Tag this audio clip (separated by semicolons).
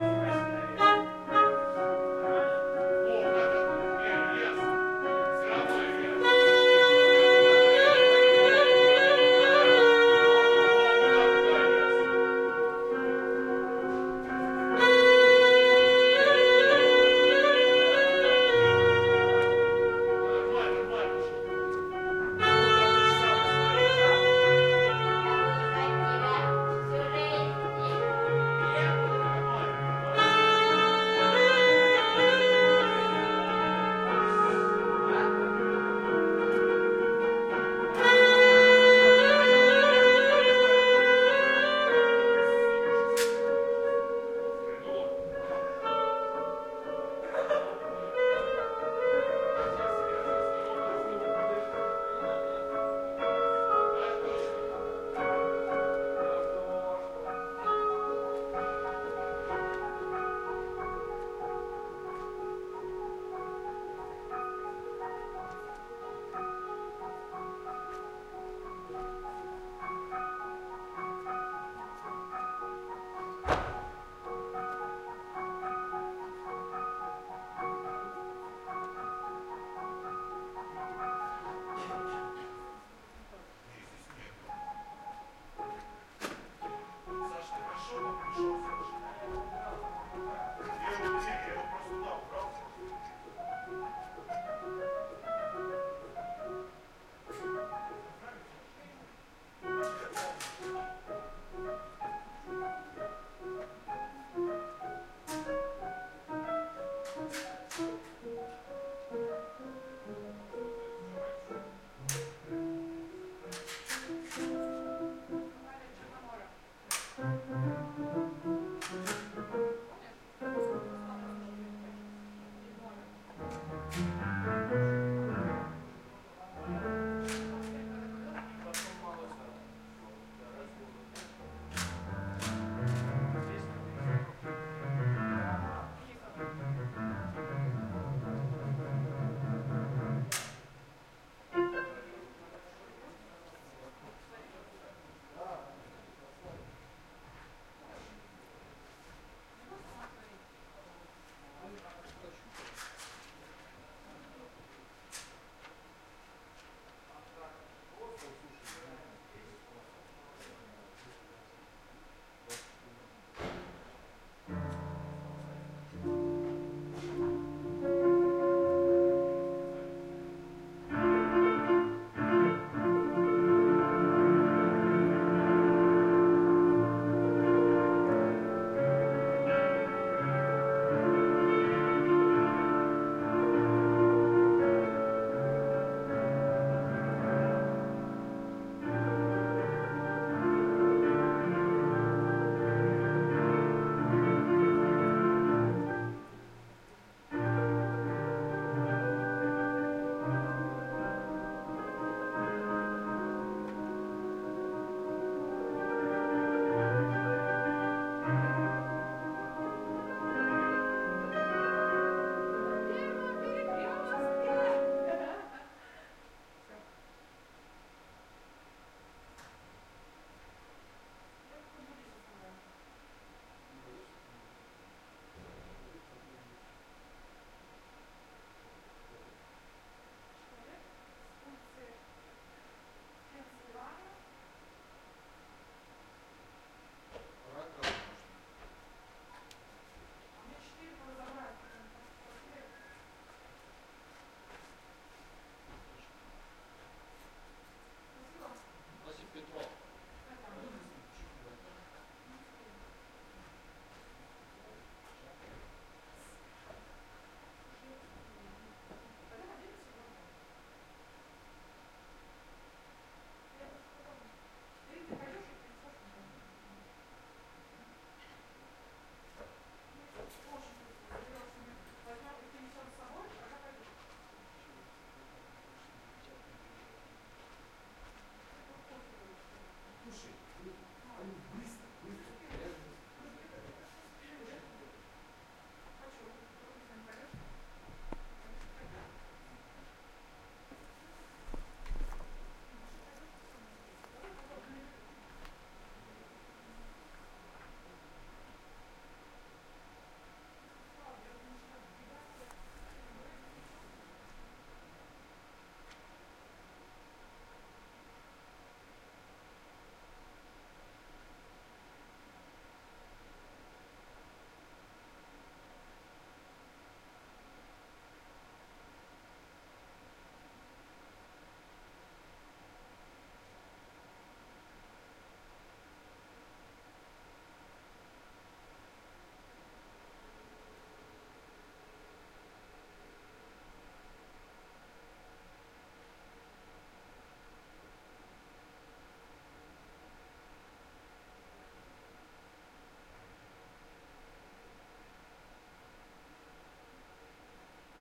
ambience; auditorium; Moscow; Moscow-Mayakovsky-Academic-theatre; musicians; music-rehearsal; people; Russia; Russian; theatre; theatre-auditorium